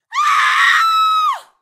Close mic. Studio. Young woman scream.